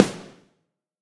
BSE SNARE 001
Various snare drums, both real and sampled, layered and processed in Cool Edit Pro.
sample, snare, drum, processed